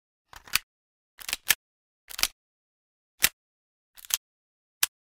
Tokyo Marui Hi-Capa 5.1 Pistol Handling
(Plastic Slide & Grip)
Mag Insert - Slide Rack - Slide Rack Slow - Hammer Cock - Dry Fire
I'll be recording a more extensive collection with multiple variants of each action soon.